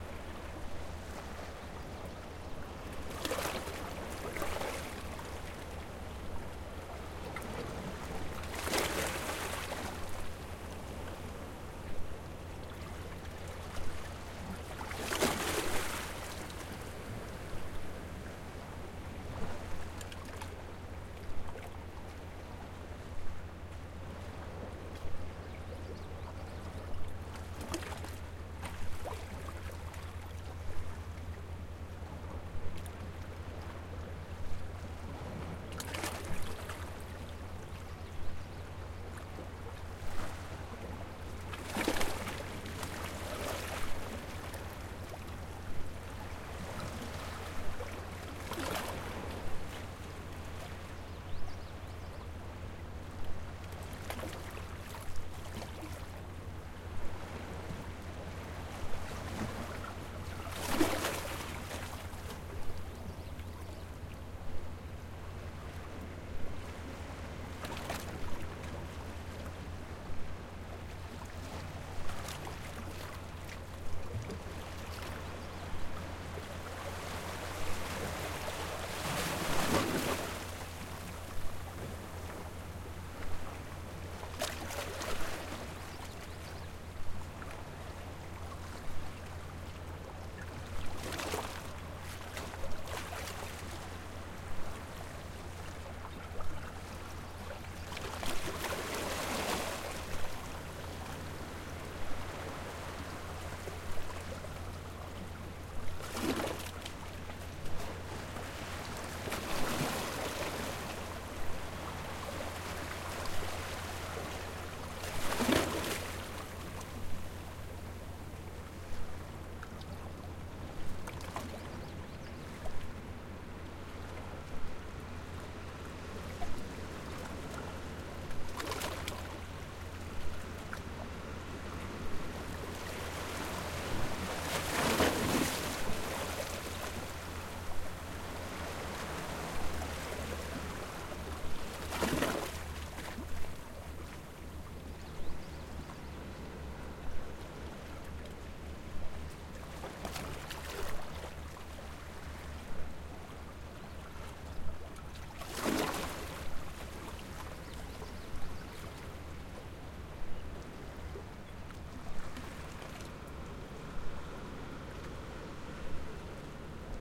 02 Lanes Island Water 1 48 24
Ocean water crashing and splashing between rocks on the shore.
crashing, island, maine, nature, ocean, rocks, sea, splashing, water